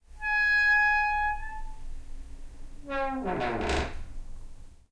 door creak 3
door creaking
recorded with a EDIROL R-09HR
original sound, not arranged
house, creak